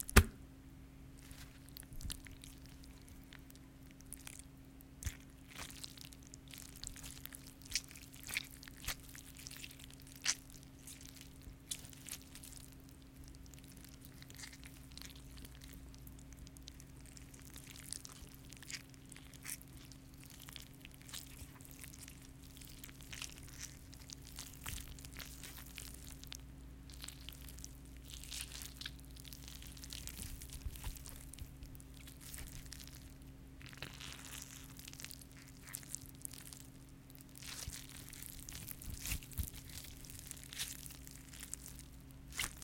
Wet Flesh & Blood Squeeze
Foley recording of wet flesh being squeezed, more subtle than my other flesh squeeze clips.